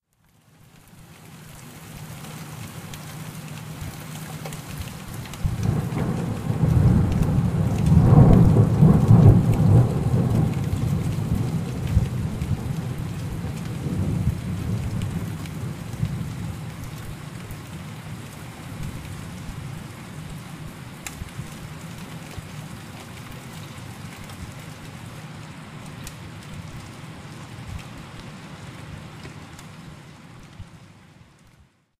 Rumbling Thunder
South Yorkshire, England August 2017, recorded with voice recorder on a Samsung Galaxy S8 smartphone and edited with Adobe Audition.